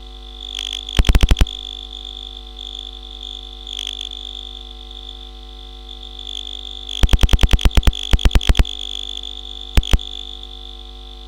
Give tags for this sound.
Astable DIY Electronic glitch lab Oscillator Soundeffects

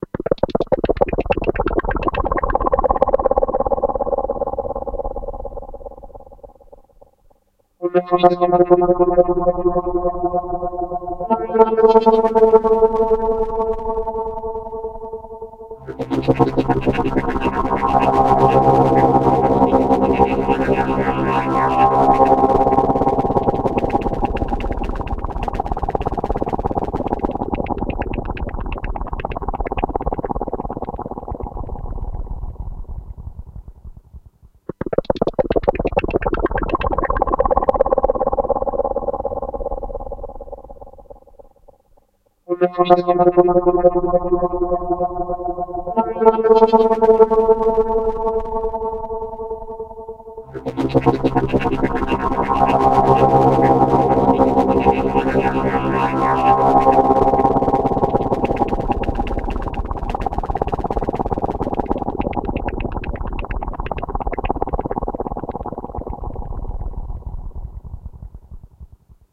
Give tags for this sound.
filter monophonic phaser

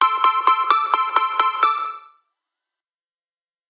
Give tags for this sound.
EDM Electric Loop Plucks

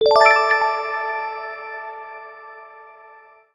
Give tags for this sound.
finished positive game win energy object accomplished success

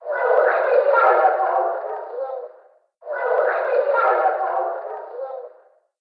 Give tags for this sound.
beat industrial loop rhythm tribal

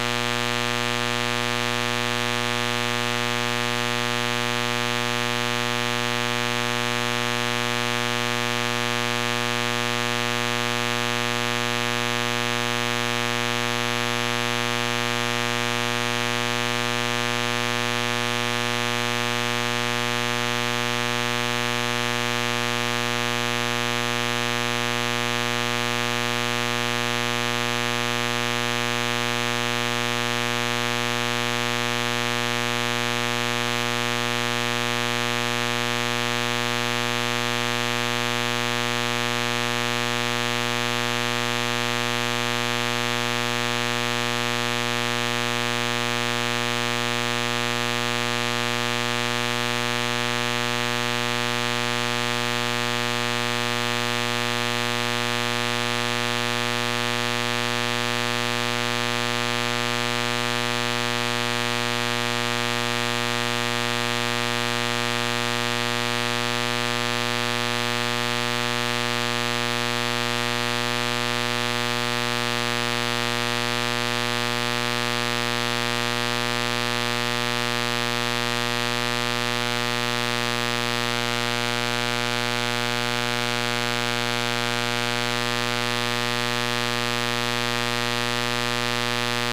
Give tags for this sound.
buzz light magnetic

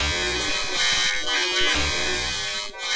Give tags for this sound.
hiss noise glitch extended-techniques break

